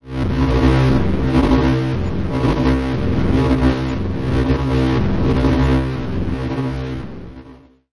Grunulated Synth using Ableton Operator and Granulator2
Key is in C
Synth, Hard, Lead, Trance